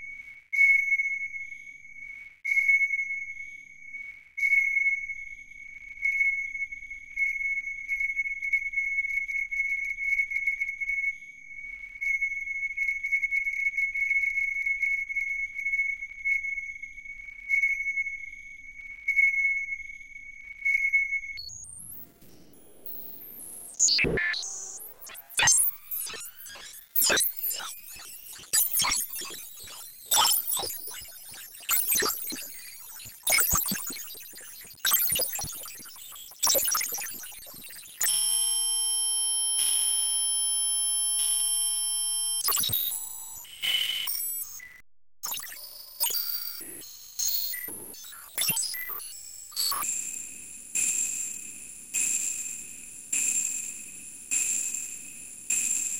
Custom programmed granular synthesis sampling instrument